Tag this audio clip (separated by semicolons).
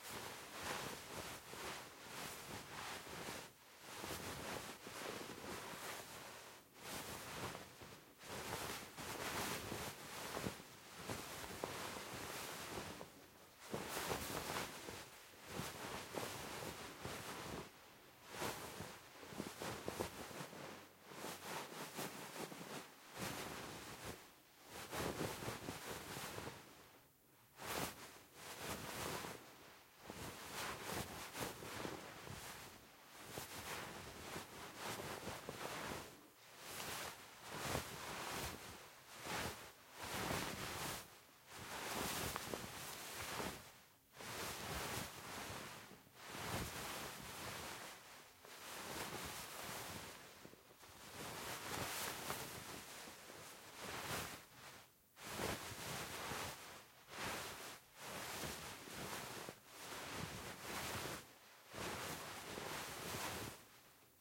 clothes; fabric; Foley; movement; shirt; t-shirt